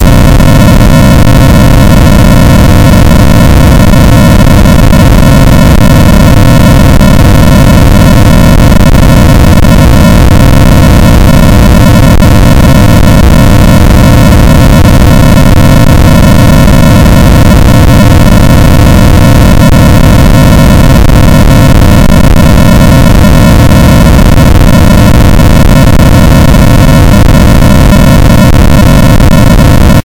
This kind of noise randomly generates the values -1 or +1 at a given rate per second. This number is the frequency. In this example the frequency is 400 Hz. The algorithm for this noise was created two years ago by myself in C++, as an imitation of noise generators in SuperCollider 2.